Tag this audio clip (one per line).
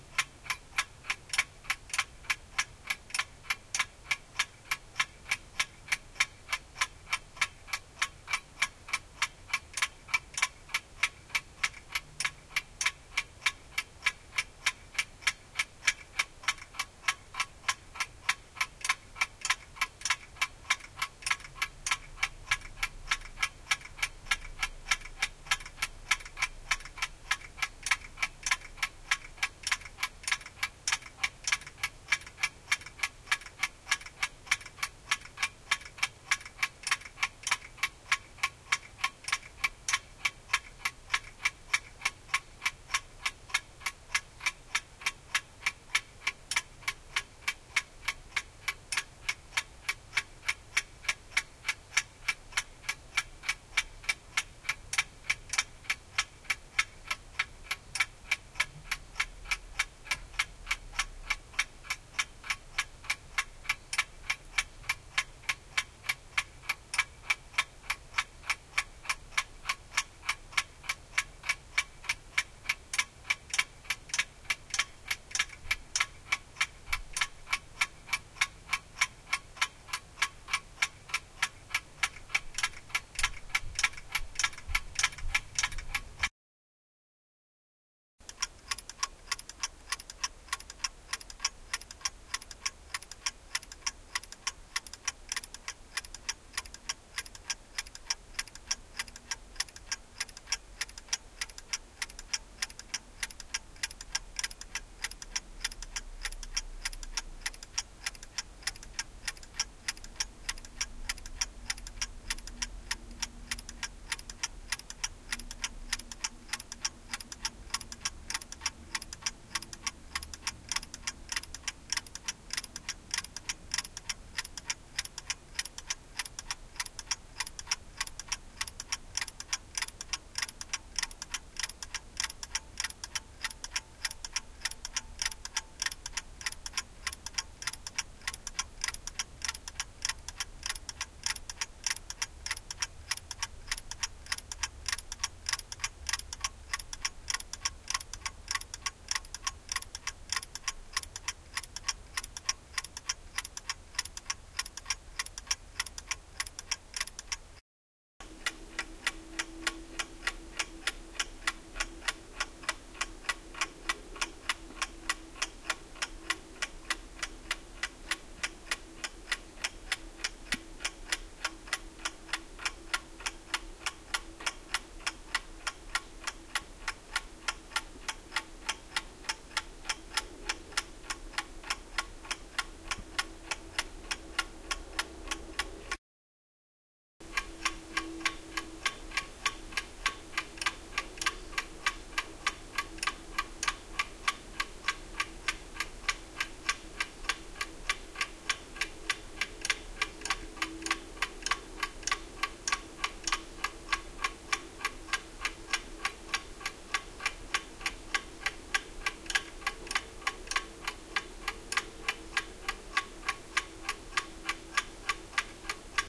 clock
tick
ticking